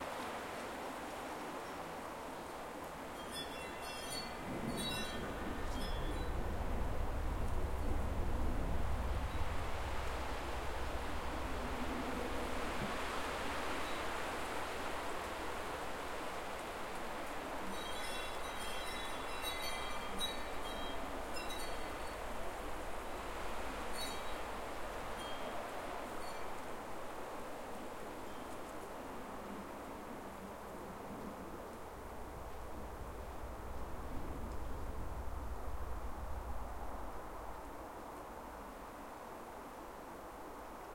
Wind Chimes2
Tree leaves hissing in a strong wind, windchimes
leaves, wind, tree, chimes, windchimes